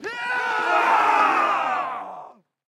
Middle Ages War Cry 3
An ms stereo recording from a Battle of Hastings re-enactment
effect, fx, sfx, sound, sound-design, sound-effect